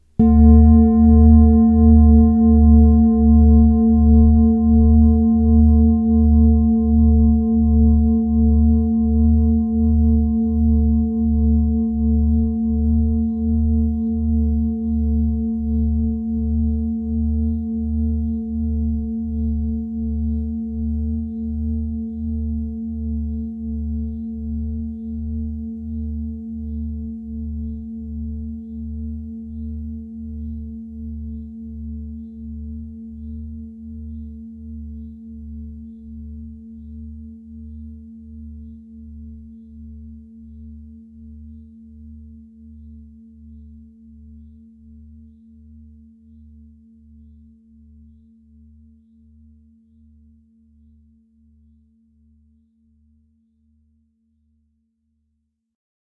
Singing Bowl (Deep Sound)
Nice deep sound of a Tibetan singing bowl soft mallet
Ambient, Audacity, Garageband, home, homerecording, iPhone11, meditation, mindfulness, Monk, Software